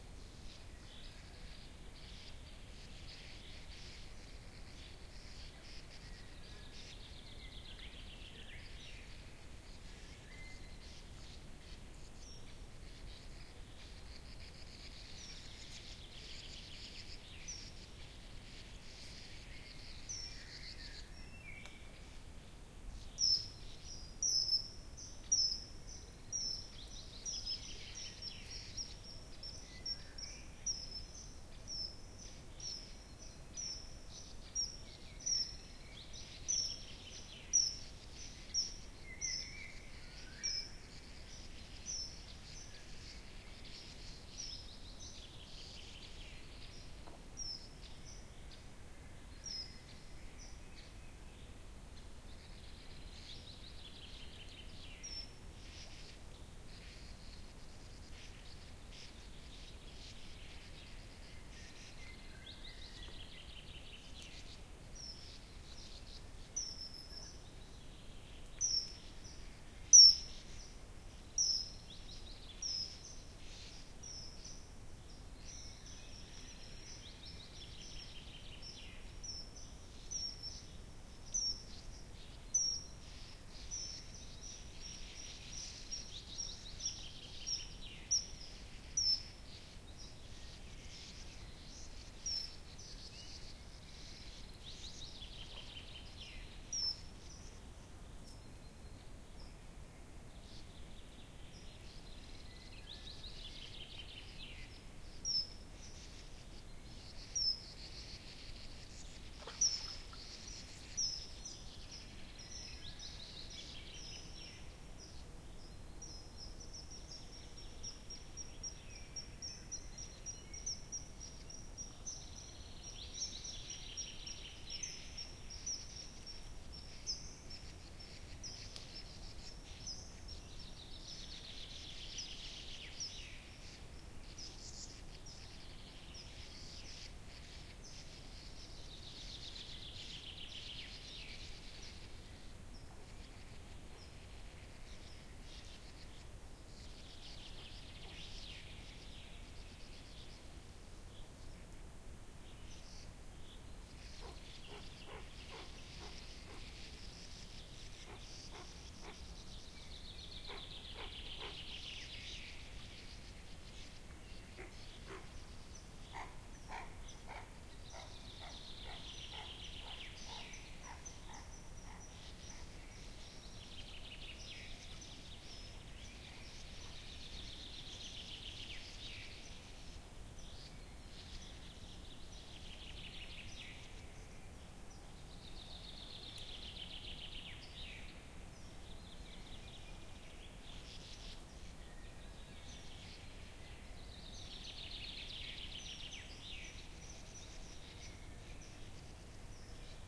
Dusk nature sounds Ambisonic Aformat
Ambisonic test recording made with a Sennheiser Ambeo mic into a Sound Devices MixPre 6 II. Mic position was 'endfire'. Not too much action but it gives a good idea of how a quiet ambience sounds in ambisonic. You will need the Sennheiser Ambeo plugin in order to convert this recording into B-format.